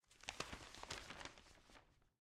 Unfolding a newspaper
Turning a page of a newspaper.